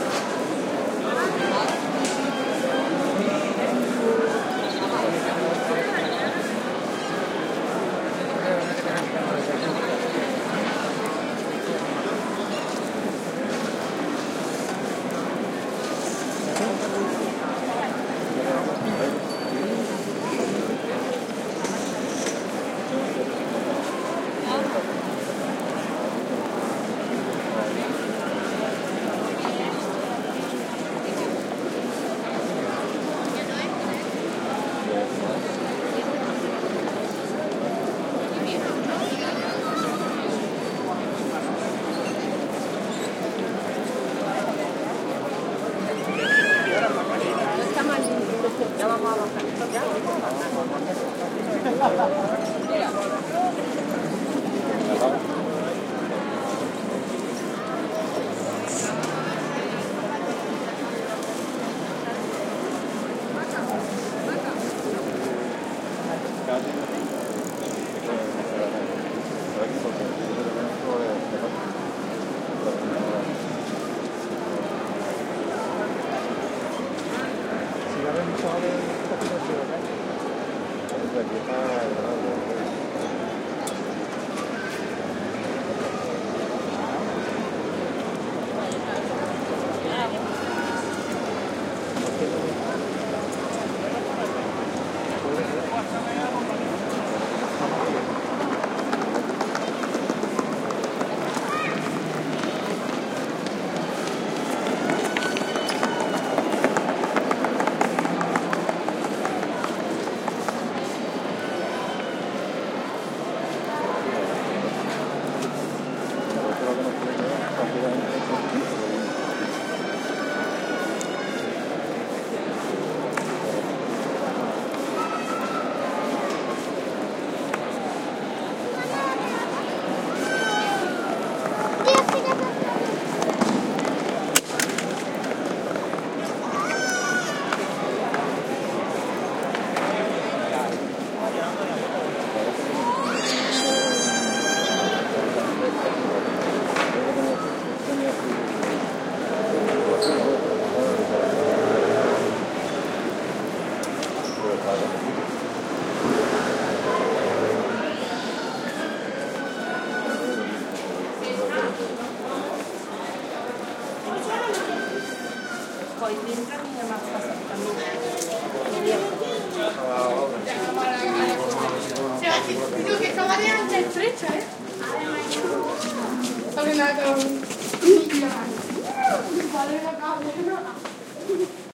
street ambiance on a sunday at Plaza de la Corredera, in Cordoba (S Spain) with people talking, a few musical notes, noise of cutlerie from neighbouring restaurants, kids crying, and one motorcycle passing. Recorded with PCM M10 recorder internal mics